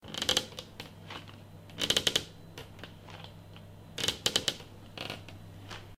Creaking floorboards 01
Creak, Floor-boards, Sound